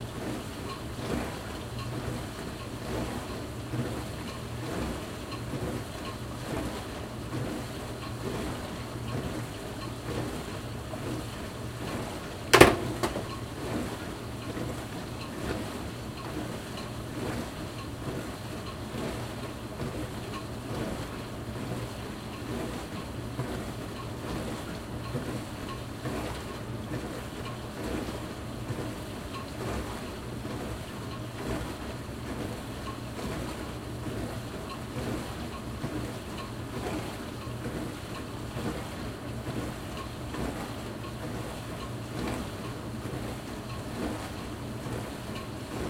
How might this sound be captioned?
Dishwasher(loud)
Continuous, Dishwasher, Kitchen, Small